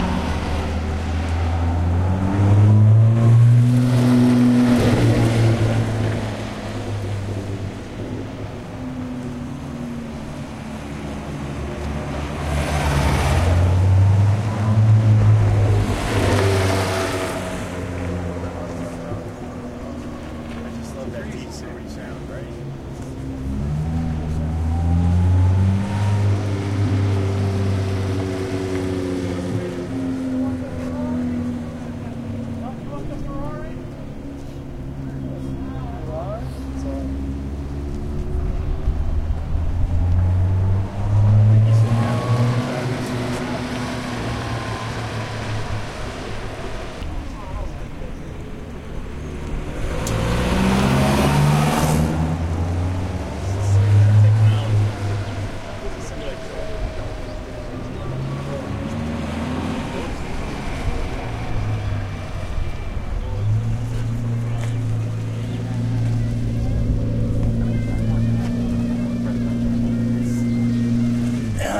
Vintage Race Car Passing 2
Stereo recording of a vintage race car passing by at close distance. There is a crowd around.